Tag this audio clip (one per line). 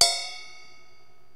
bell
crash
cymbal
live
loop
loops
rock
techno